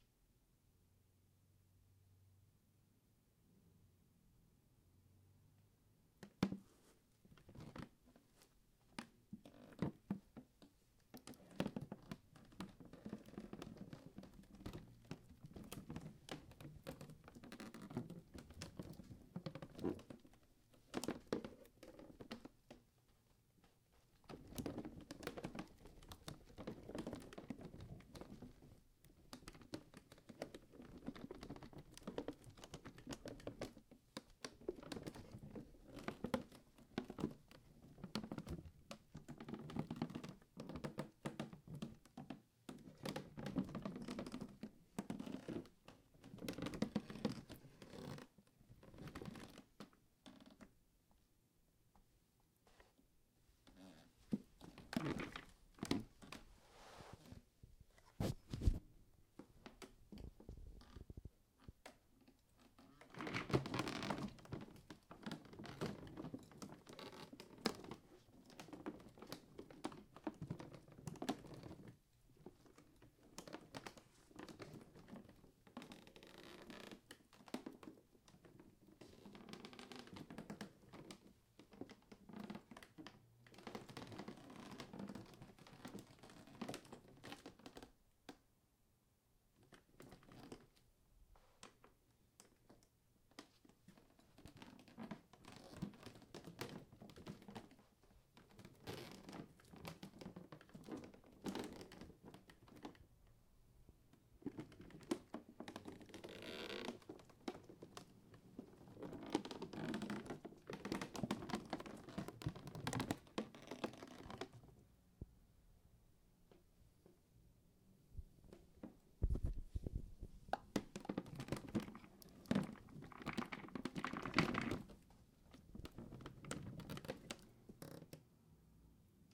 Foley, cracking floors, take-3
Foley of creaking floor boards, take 1.
I'd also love to hear/see what you make with it. Thank you for listening!
boards, creaking, floor, foley